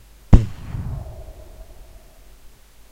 Soft explosion puff
Very soft impact
impact, soft